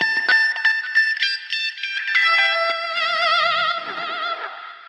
Short guitar sample made with my Epiphone Les Paul guitar through a Marshall amp and a cry baby wah pedal. Some reverb added.
processed, electronic, music